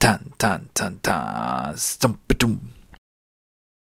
TaTaTum1 2b 120bpm
Ta ta sounding rhythm